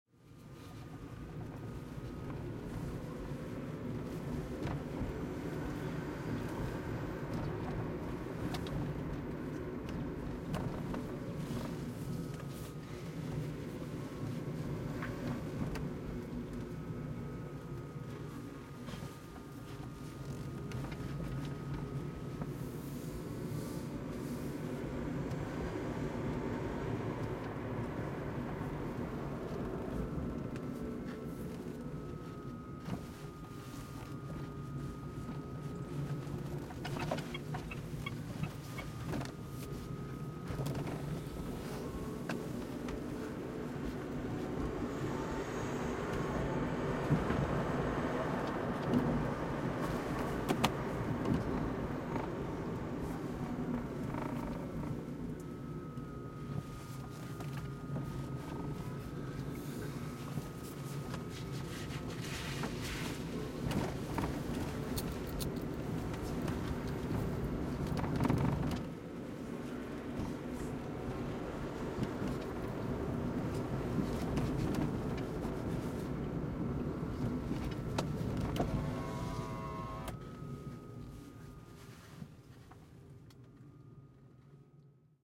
MITSUBISHI IMIEV electric car DRIVE int
electric car DRIVE
car, DRIVE, electric